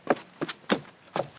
Walking on wood 3
A very short series of quick footsteps on loose wooden planks, recorded at close range.
feet, footsteps, plank, quick, scuff, Walk, Walking, wood